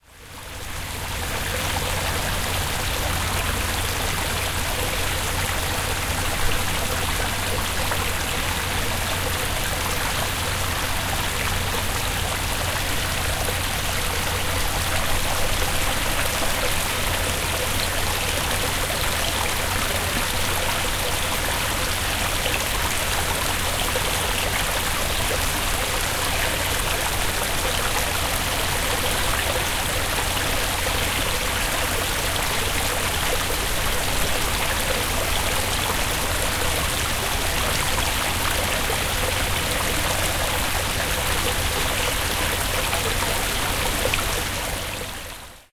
Water flow at Gyeongbokgung Palace.
20120711